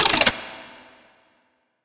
glitch robot
robot sound , glitched sound